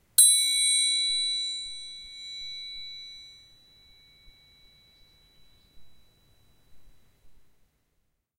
Triangle, 4'', Hard Hit, A
Raw audio of a single hit on a "4 inch (10cm) triangle" using a hard metal beater.
An example of how you might credit is by putting this in the description/credits:
The sound was recorded using a "H1 Zoom recorder" on 13th September 2016.